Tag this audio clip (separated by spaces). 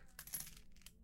break glass sound